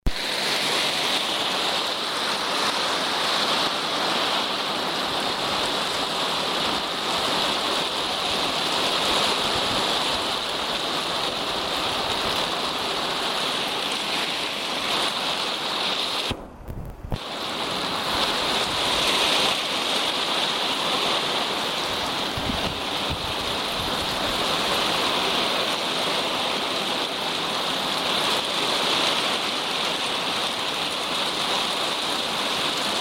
rain heard from inside a house